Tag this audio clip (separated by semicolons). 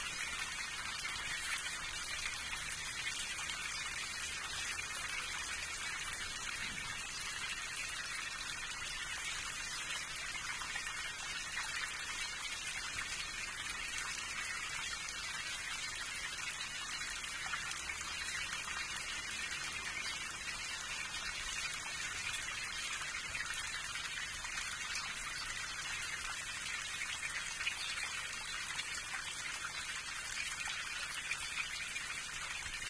brooklet; fieldrecording; flushing; lake; nature; river; water